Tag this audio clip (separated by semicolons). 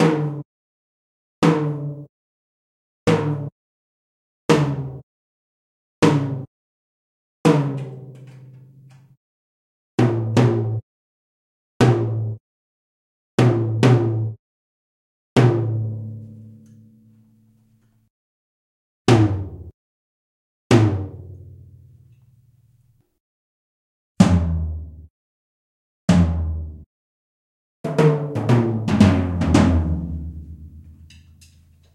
tomtom; tom